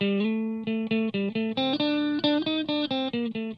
electric guitar certainly not the best sample, by can save your life.